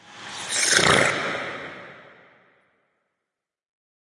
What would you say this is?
Reversed Prrrring Sound